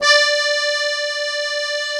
real acc sound
accordeon, keys, romantic